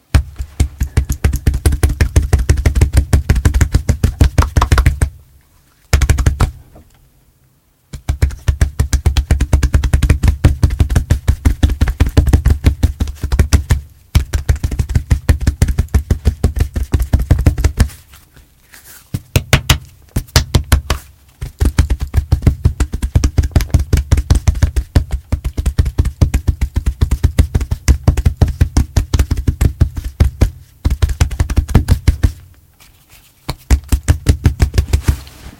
20090405.chest.impacts
beating my chest with hands (gorilla-way), close mono recording. Sennheiser MKH60 + Shure FP24 into Edirol R09 recorder
body, chest, chestbeating, closeness, display, drum, gorilla, impact, male, rumble